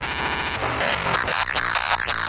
-GRAIN SQUEEGIE
weird fx ting
future, soundesign